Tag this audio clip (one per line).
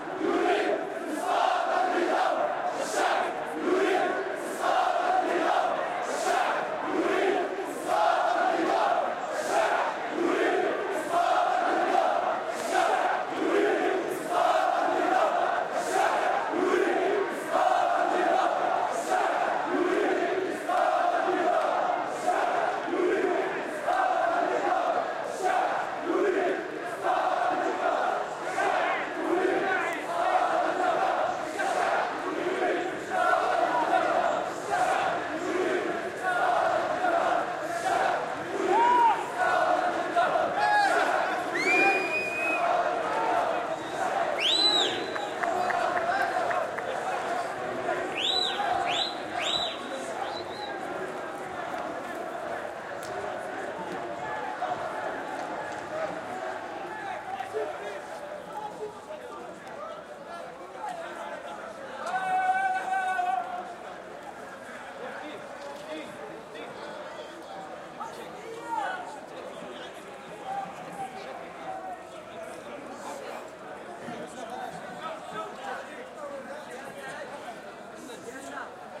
arab
crowd
field-recording
Protest
spring